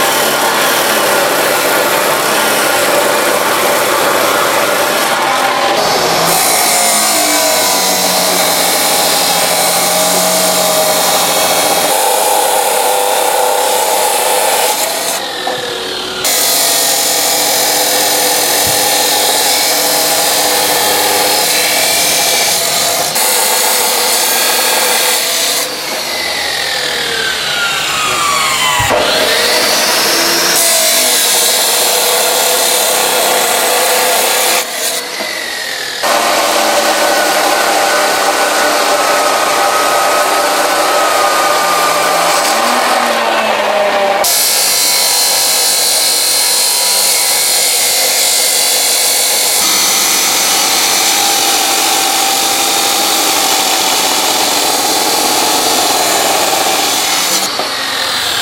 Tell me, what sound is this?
Construcion Site in Thailand.